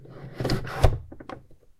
Insert a mug into machine